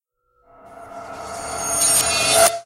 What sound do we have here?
Build Short 01
a short build-up to a crash sound